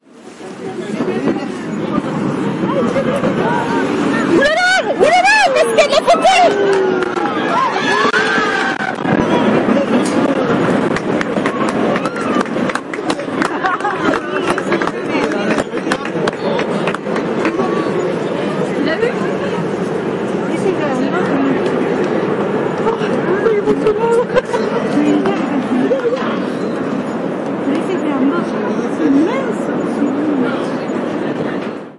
20160304 08.glacier.hysterics
Excited tourists spoil a recording of the rumble of ice calving at the Perito Moreno glacier front (Los Glaciares National Park, Argentina). Soundman OKM capsules into FEL Microphone Amplifier BMA2, PCM-M10 recorder
calving, crying, excitement, field-recording, glacier, ice, people, rumble, shouting, talk, tourists